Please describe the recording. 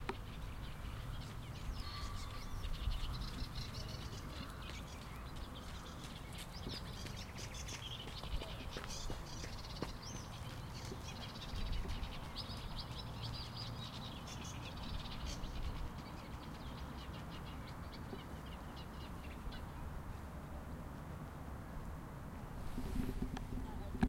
This is a rare bird, his Latin name is acrocephalus shoenobaenus, which lives near the water for example lake or river. It was recorded in Kielce in Poland with Zoom H2N (XY).